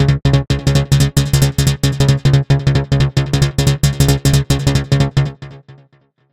27 ca dnb layers
These are 175 bpm synth layers background music could be brought forward in your mix and used as a synth lead could be used with drum and bass.
sample, fx, samples, background, multi, layers, lead, layer, synth, bass, drum